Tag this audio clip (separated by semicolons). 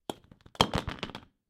bum; falling; wood